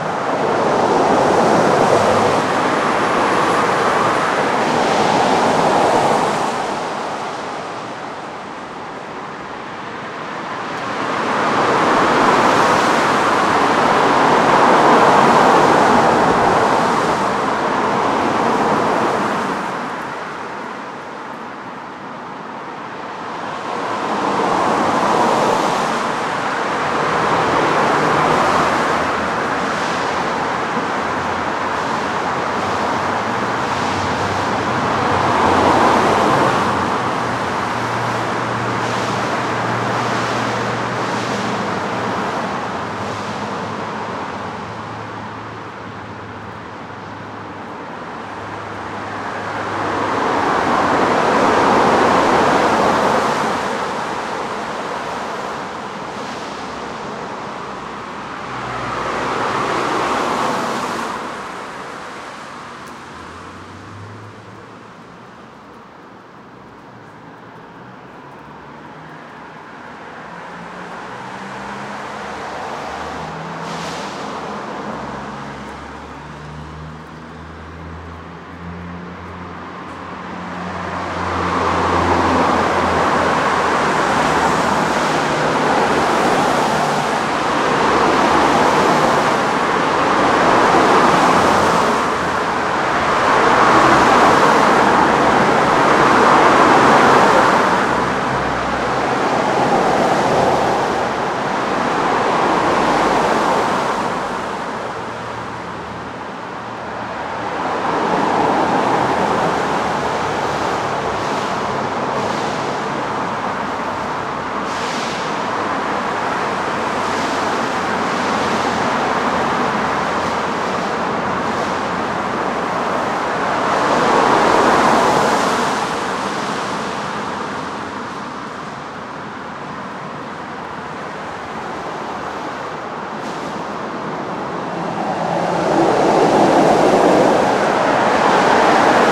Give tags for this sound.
Street
road
Traffic
city
loud
rain
public

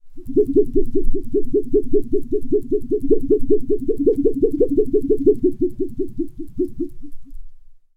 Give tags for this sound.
board
dance
drum
effect
groovy
hit
pad
percs
percussion-loop
plastic
SFX
wha
wobble

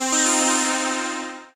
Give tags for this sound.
chords
pads

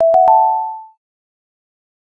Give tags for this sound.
object
life
item
game
energy
pick-up
collect

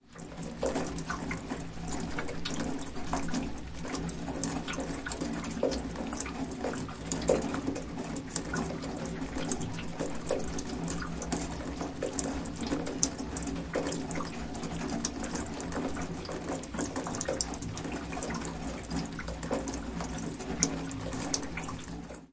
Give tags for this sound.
ambiance
ambience
atmosphere
field-recording
gloomy
gloomy-weather
gray
grey
gutter
nature
outdoors
outside
raining
rainy
soundscape
water
weather
wet

